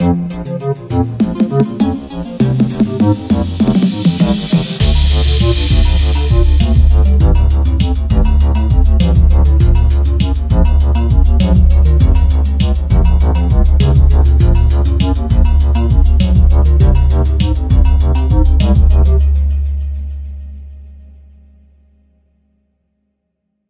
Sakura E-Keys (Intro)
Putting the notes of an electric keyboard on a very low frequency and some heavy kicks will give you this. It sounds pretty cool in my opinion as it was done using the Sakura-plugin on FL Studio, which is mostly used for more traditional (also Asian) sounds.
Made using FL Studio
bass, beat, clumsy, drums, intro, wobble